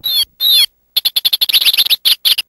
Sounds of electronic toys recorded with a condenser microphone and magnetic pickup suitable for lofi looping.